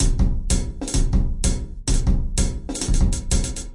odd time beat 120bpm reverb-16
odd time beat 120bpm